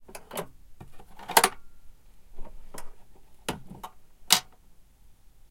Content warning
Switching on the old gramophone.
Recorded in stereo on a Zoom H4 handheld recorder.
gramophone, mechanics, switch-on